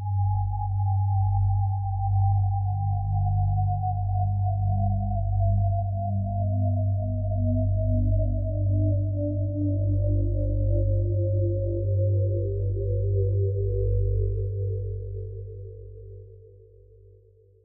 A slow drone with slow pitch drop in the key of G 95bpm 8 bars long